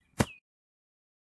Footstep on grass recorded with Zoom Recorder